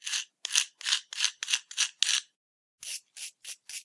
Pony-Hufe auskratzen 01
The cleaning of hooves of ponies / horses. Scratching and brushing imitated with the right tool but using a stone in default of having a real hoof. My daughter assisted.